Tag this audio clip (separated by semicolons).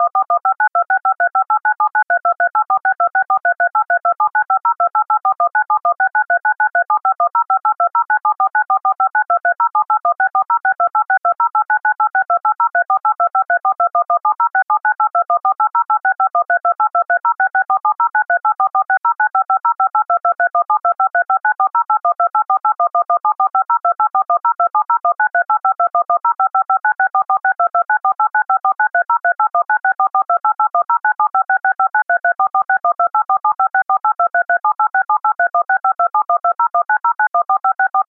code; dtmf; number; pi